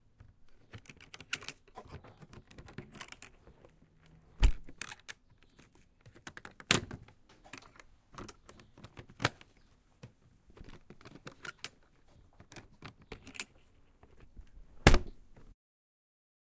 Metal case, open and close with Clips
Metal case, opening and closing: Metal on Metal, Clips locking, metal snap sound. Recorded with Zoom H4n recorder on an afternoon in Centurion South Africa, and was recorded as part of a Sound Design project for College. A metal briefcase with metal clips on the exterior was used to record this
briefcase-close, briefcase-open, case, close, closing, Metal, open, opening, owi